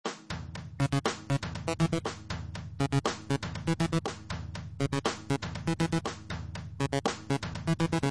Techno-DrumLoop2
techno-beat, game-sound, game, beat